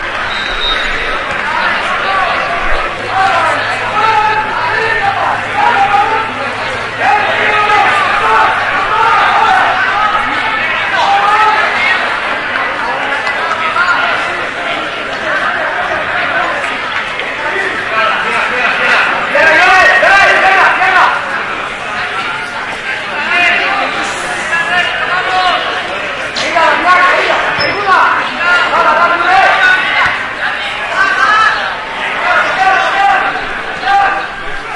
This sound was recorded with an Olympus WS-550M in the Football stadium of Figueres. It's the start of a football match between Figueres and Peralada teams.